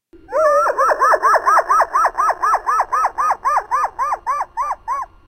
laughing blackbird
Short parts cut out of a blackbird song, played with 15 to 25% of the original speed leading to an amazing effect.